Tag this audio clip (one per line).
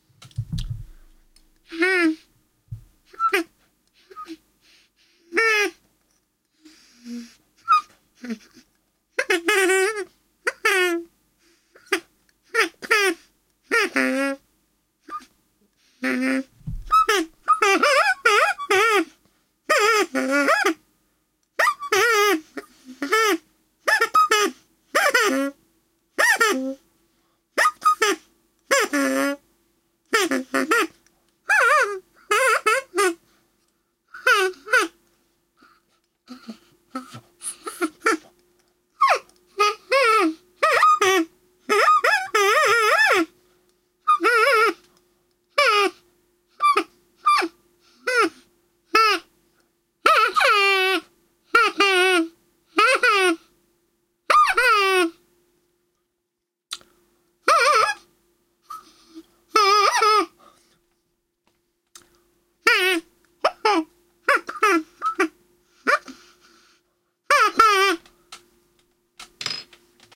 toy
squeak
clown
foley-sounds
hand-puppet
special-effects
squeek
party
cartoon
horn
honk
puppet